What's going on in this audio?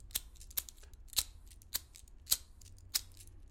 Lighter not working
sound, lighter, work